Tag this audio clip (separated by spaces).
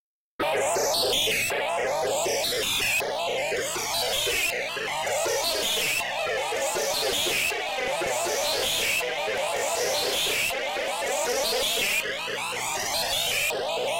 riff electronica soundscape synth